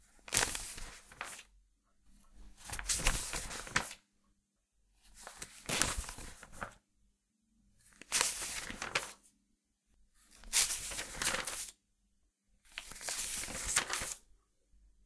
Softer pages turning over.